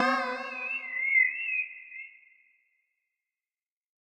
Short springy sound followed by a wobbly whistle. Usable as a software prompt or signal in a game.
signal, boing, unsteady, springy, high, sharp, short, thin, prompt, boink, whistle, announce, wobbly, metallic